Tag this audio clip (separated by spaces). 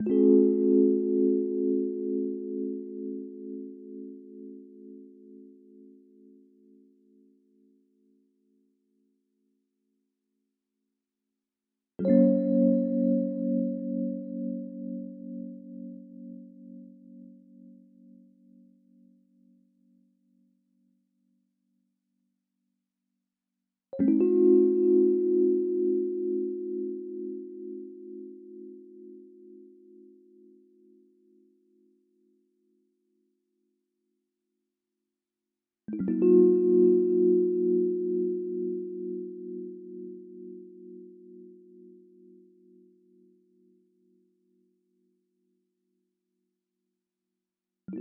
Chords Lounge Rhodes